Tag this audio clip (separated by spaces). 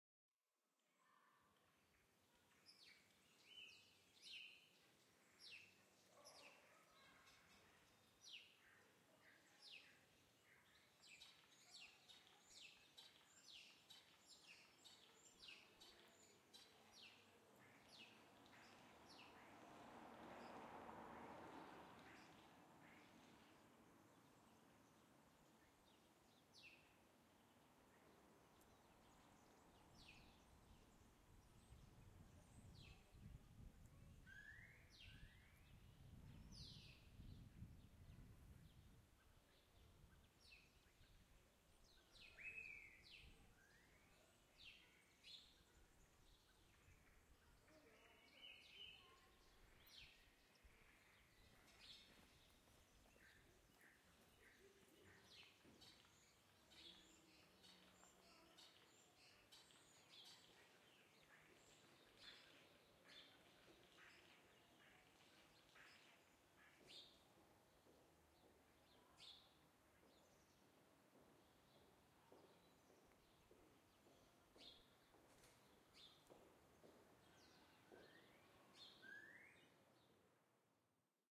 Andalusia square Andalucia plaza paisaje Spain tranquilo birds quiet landscape trees Espana Ronda